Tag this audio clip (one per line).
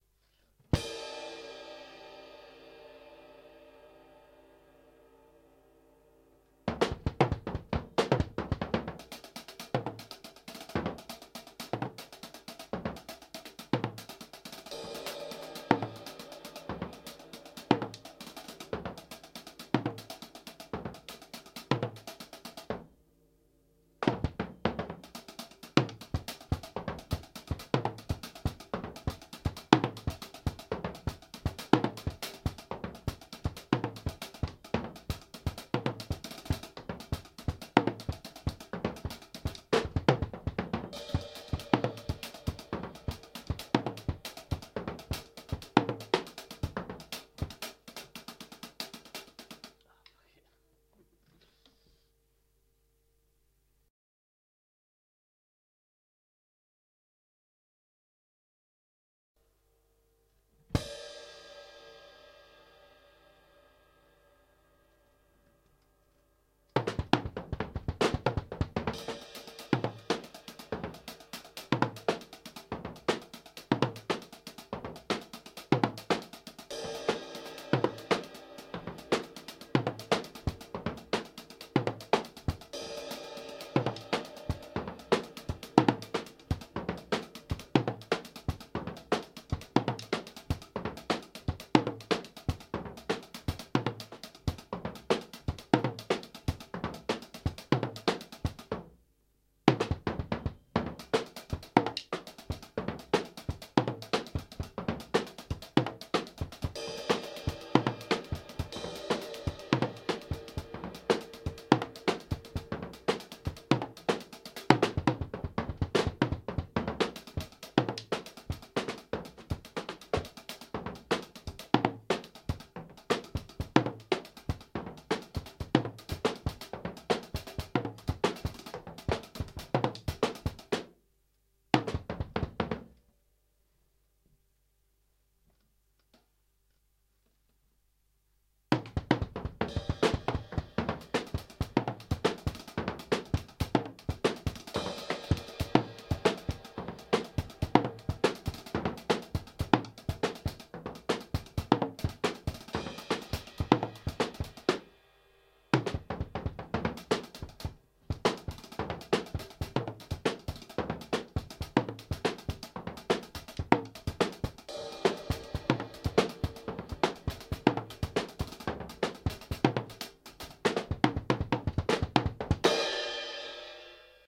shark
jazz
manikin
london
samples
producer
space
free
ace
time
drum
robot